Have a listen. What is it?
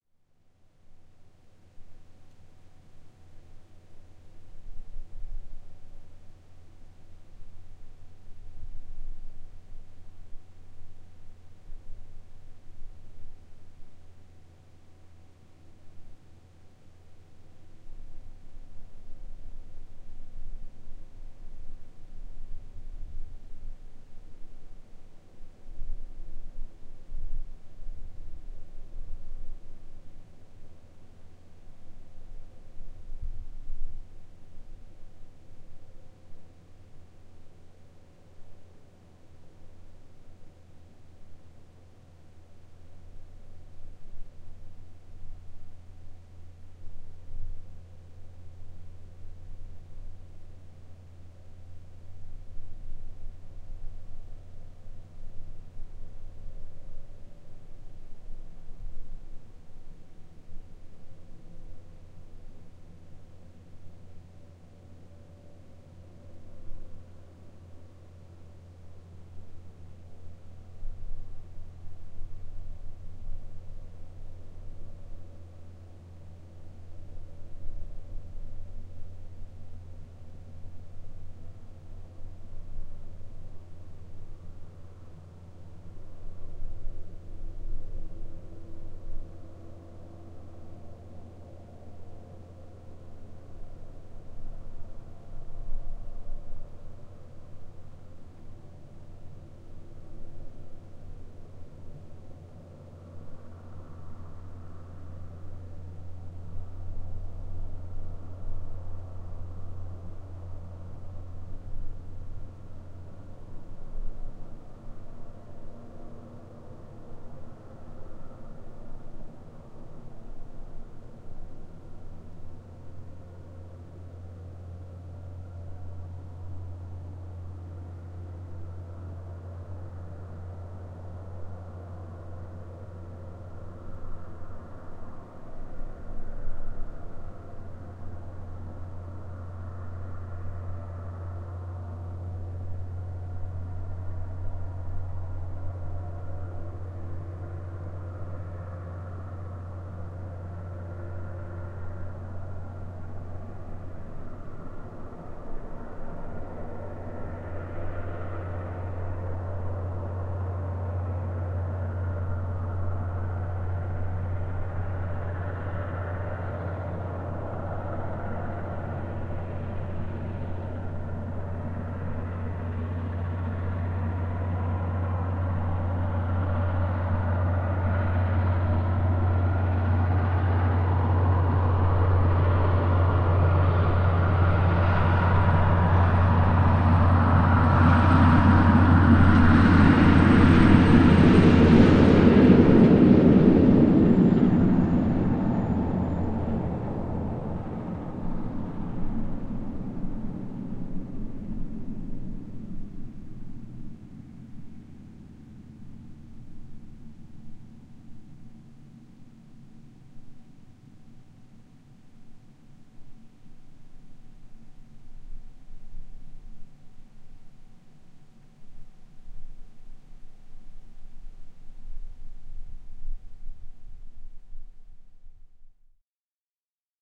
Road Train on the Tanami Track
This was recorded on a quiet, still night on the Tanami Track.